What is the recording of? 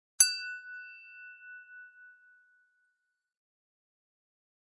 crystal glass
Tapping on a crystal wineglass with a wooden barbecue stick. OKM binaurals, Marantz PMD671. Noise reduction.
clear, chime, clang, wineglass, jingle, wine, crystal, clink, tintinnabulate, purist, ping, pure, glass, tinkle, ting